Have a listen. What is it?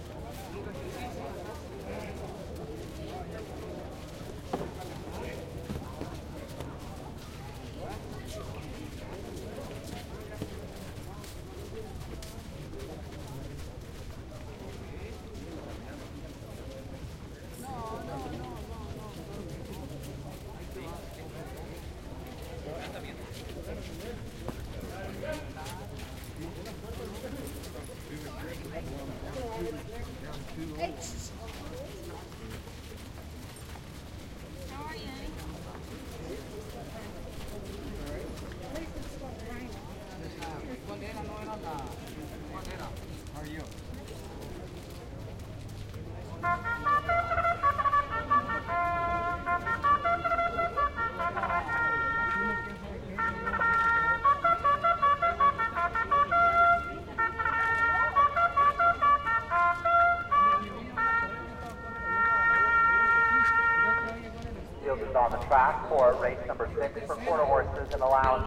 This is a recording of the horses warming up in the paddock at Arapahoe Park in Colorado.